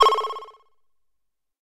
Analog Synth 01 E
This sound is part of a pack of analog synthesizer one-note-shots.
It was made with the analog synthesizer MicroBrute from Arturia and was recorded and edited with Sony Sound Forge Pro. The sound is based on a triangle wave, bandpass-filtered and (as can be seen and heard) pitch modulated with an pulse wave LFO.
I've left the sound dry, so you can apply effects on your own taste.
This sound is in note E.
analog, modulated, synth, lfo, synthesizer, fx, analog-synth, electronic, pitch, one-shot, effect